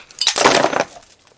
Sounds almost like some wood is involved in the materials thrown because the glass is thick. Includes some background noise of wind. Recorded with a black Sony IC voice recorder.